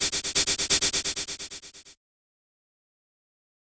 sneezing-hat
"25703 Walter Odington Sneezing Hat" used in a loop with some delay/reverb.
I don't know how to credit a "remix" on here...
sound-effect, shaker, loop, processed, hi-hat